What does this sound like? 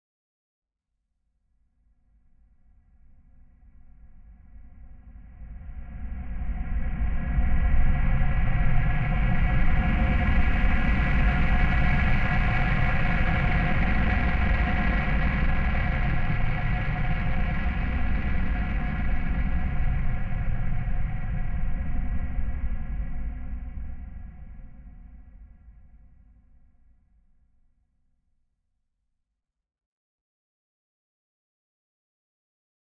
Heavy spaceship fly-by
Sound of a heavy spaceship flying by. Made with Serum, HOFA MS-Pan and Tritik Krush.
I uploaded the source material before panning and distortion as well, so you can build your own fly-by. If you want the same distortion settings, just use the init patch in Krush and turn up the Drive to about 60% and Crush to 30%, adjust to taste.
hover, future, spaceship, drone, exhaust, alien, fly-by, heavy, futuristic, sci-fi, fly, space, sounddesign, sound-design, engine